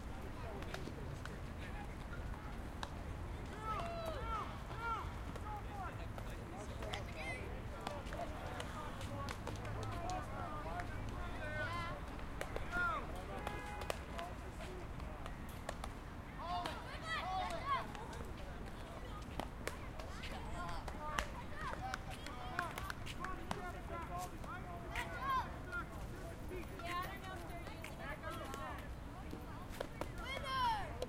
Day Baseball Practice
Field recording of a baseball practice at a park during the day.